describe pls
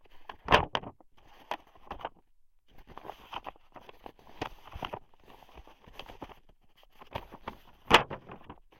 Newspaper Flipping
paper, new, pages, Newspaper, turning, flip